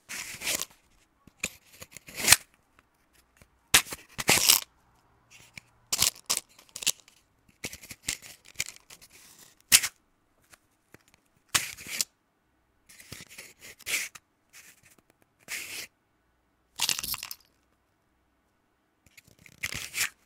Matchbox Open and Close
Opening and closing of a small matchbox filled with 20 matchsticks. The movement was performed multiple times at various speeds.
Recorded with Sennheiser ME 64 on Focusrite Scarlett.
Flame, Lighting, Matchsticks, Match-Box, Light, Burning, Matches, Fire, Match, Matchbox, Starting, Phosphorus, Moving, Rattling, Box